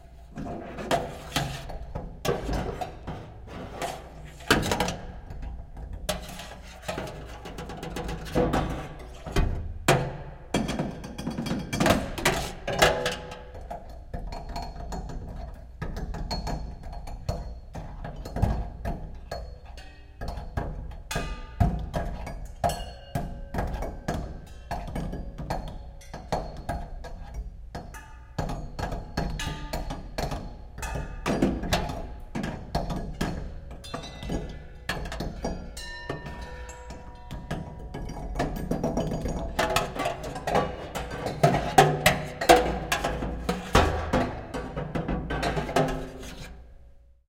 Captured the sounds of an indoor metal sculpture made by Gerren Dugger out of found culinary objects from the hurricane Katrina disaster site in New Orleans. The sculpture was housed in the Arts Place gallery in Lexington, Ky. Used MM-BSM-7 mic for recording.